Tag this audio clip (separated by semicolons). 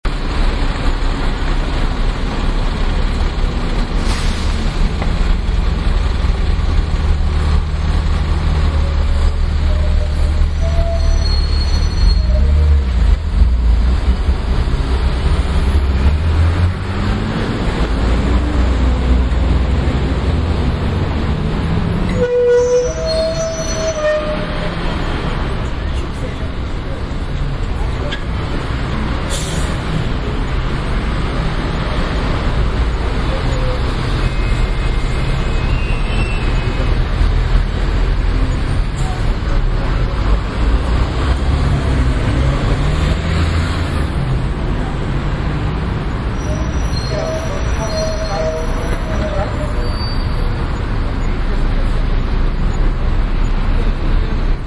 london; train; binaural; london-underground; station; city; metro; underground; tube